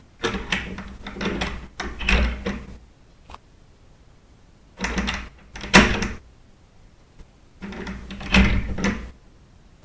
This sound is recorded by Philips GoGear Raga player.
There is unlocking door by middle big key.